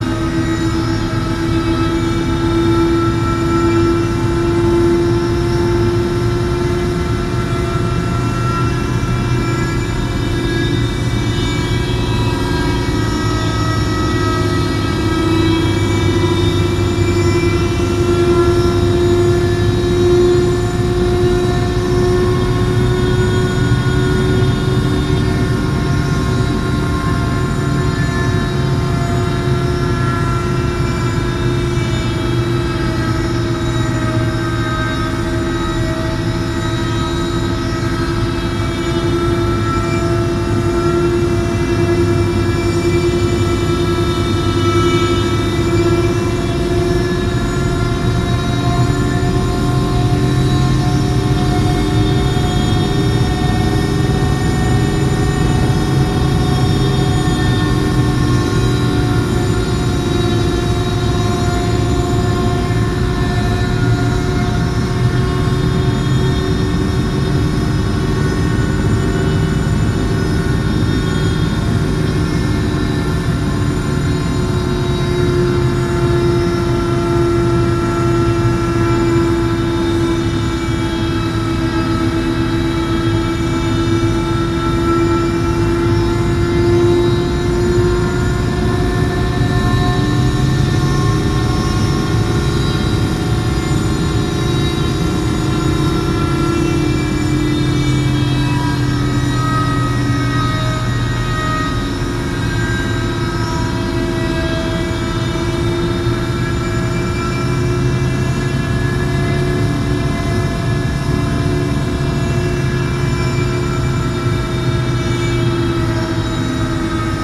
This is a compilation of spaceship cockpit noises I created for a sci-fi themed game project. Since some of the sounds weren't used in the game, I've uploaded these for everyone to use for free.
All the sounds were created with Native Instruments' Massive synthesizer and several field recordings.
You can find and download other sounds from the project in the pack.